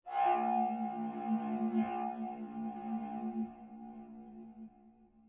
terror FX 1
A terror pad. I like it. Have some time stretch and high resonance.